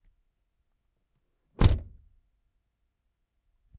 Car Door Shut
Sound of the front driver side door of a toyota matrix shutting.
Car, close, door, shut, slam